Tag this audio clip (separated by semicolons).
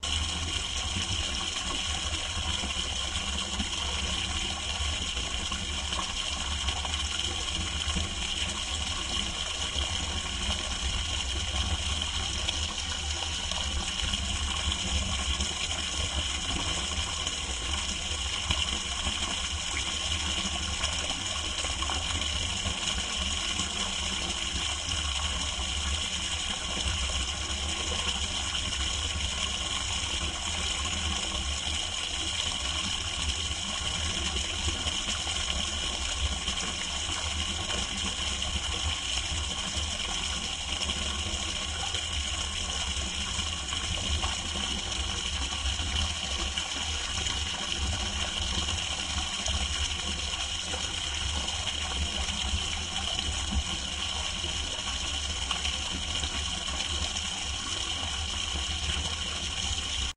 ambient; field-recording; movie-sound; pipe; sound-effect; water; water-spring; water-tank